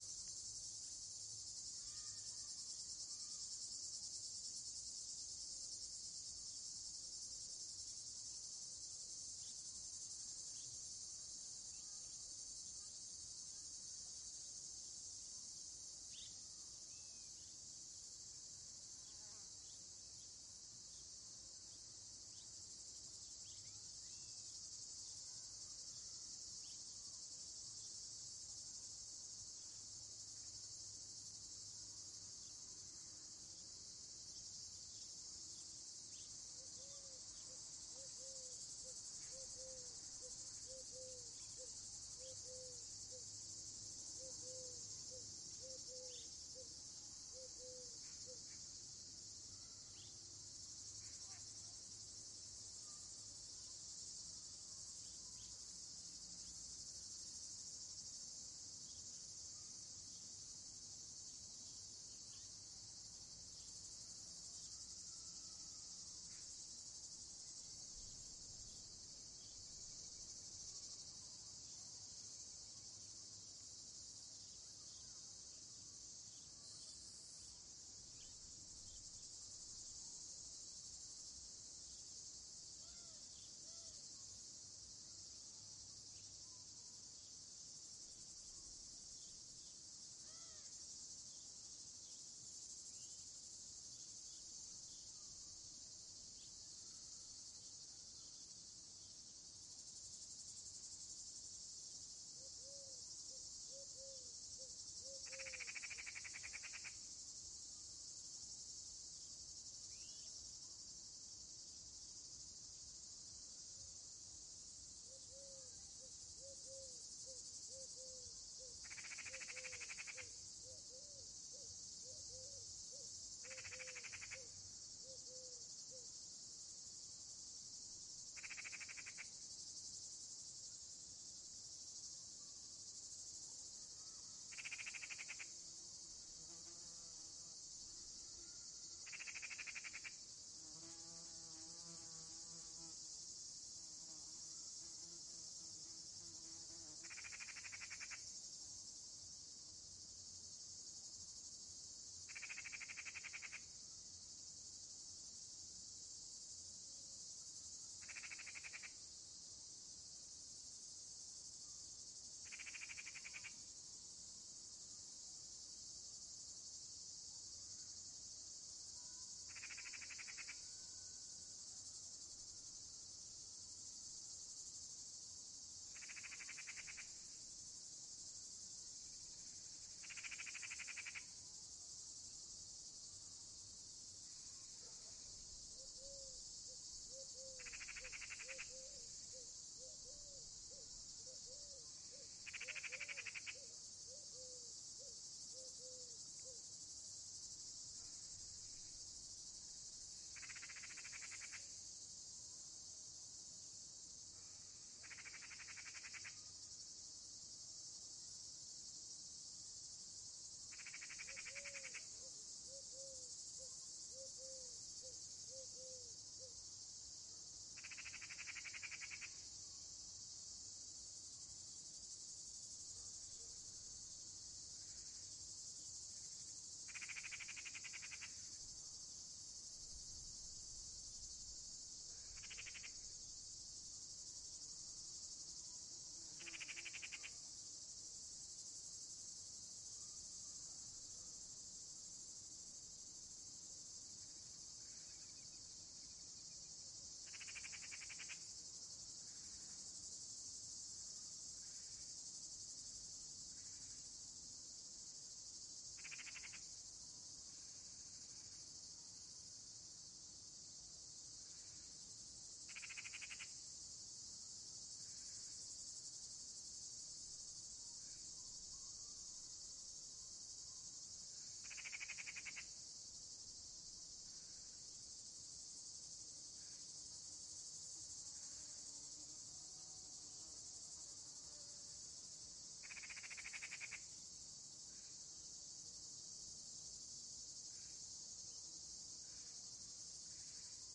BGSaSc Greece Campsite Evening Cicades Crickets Birds Raven Eagle Owl Insects Distant Children 06
Campsite Evening Cicades Crickets Birds Raven Eagle Owl Insects Distant Children Greece 06
Recorded with KM84 XY to Zoom H6